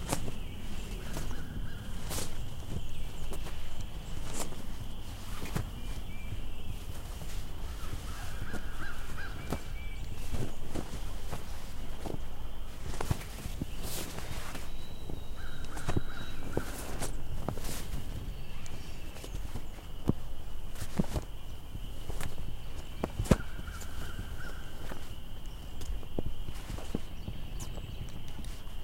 Flag Flapping and Birds 3
Birds and nature ambience throughout recording.
bird, blow, cloth, flag, flap, movement, outdoor, wind